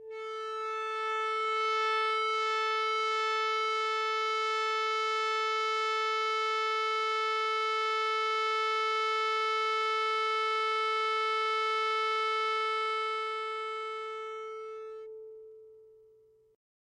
EBow Guitar A4 RS
Sample of a PRS Tremonti guitar being played with an Ebow. An Ebow is a magnetic device that causes a steel string to vibrate by creating two magnetic poles on either side of the string.
a4, ambient, drone, ebow-guitar, melodic, multisample